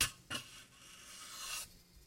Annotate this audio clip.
Brush scrape on metal
metal, plastic